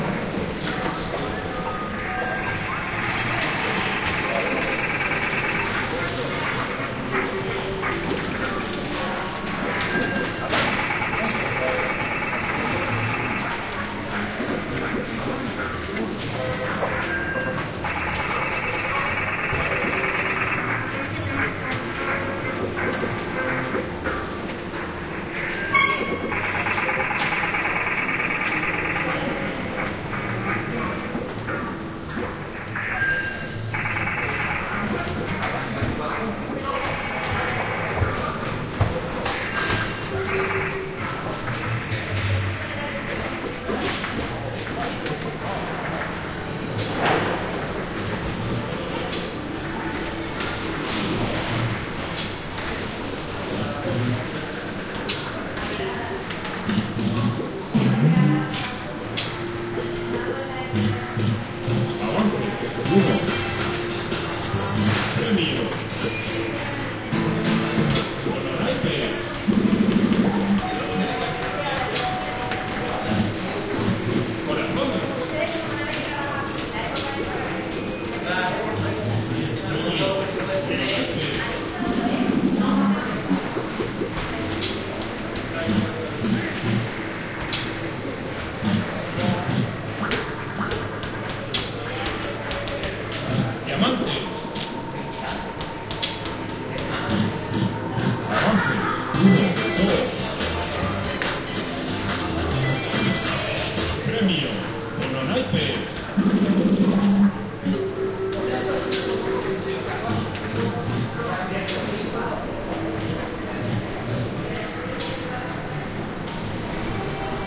slot.machine.arcade
binaural recording of ambient in a slot machine arcade /grabacion binaural del ambiente en una sala de máquinas tragaperras
field-recording, sevilla, slots